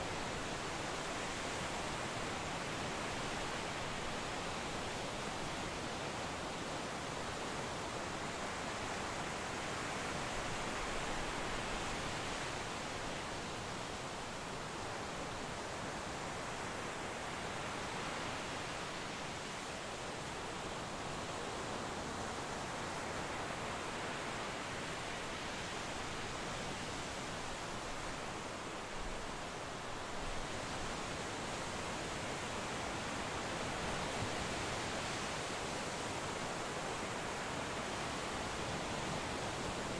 Beach Surf Noise
Recorded in Destin Florida
General beach noise recorded away from the water.
beach, breaking-waves, coast, field-recording, lapping, nature, ocean, relaxing, sea, sea-shore, seashore, seaside, shore, shoreline, splash, surf, tide, water, wave, waves